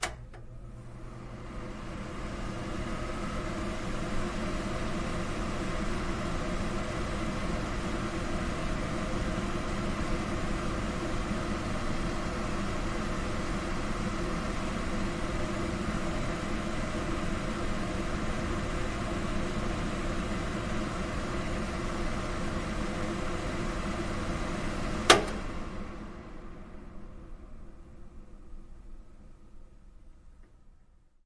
Kitchen fan
air-conditioning, exhaust, fan, kitchen